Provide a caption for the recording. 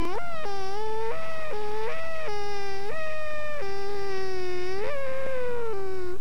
A vocal squeak made by stringing together different tones